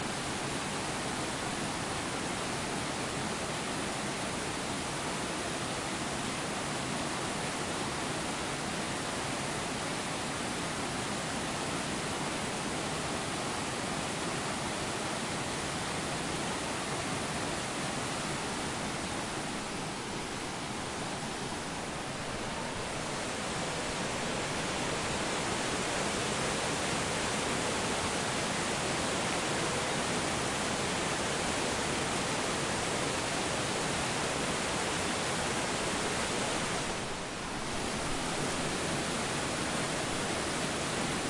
in the forest 2
A rushing stream in Norway.